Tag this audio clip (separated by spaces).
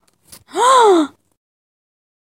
Asombro ohhh waooo